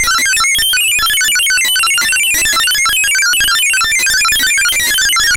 Funny sample-and-hold random happy bleeps from a Yamaha TX81z FM tone generator. Always a classic.